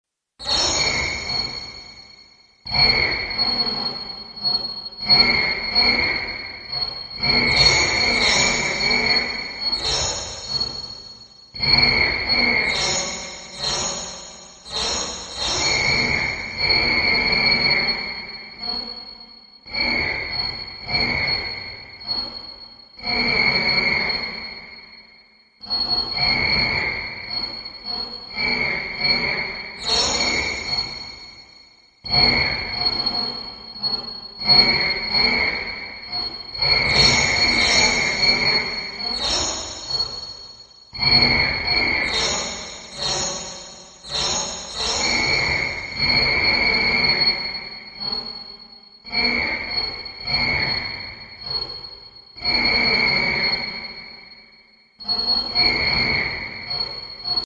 Birds Mid
This was created using the audio to midi feature in Ableton. A sample of field recording was cut up into 3 small snippets. These snippets were then triggered with an audio to midi pass using the original sample to determine the rhythm.